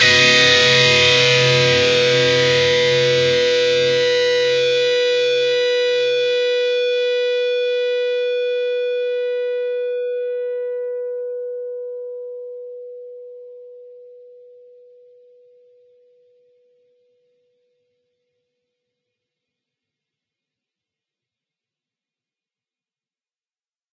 Dist Chr Bmj 2strs 12th
Fretted 12th fret on the B (2nd) string and the 11th fret on the E (1st) string. Down strum.
chords, distorted, distorted-guitar, distortion, guitar, guitar-chords, lead, lead-guitar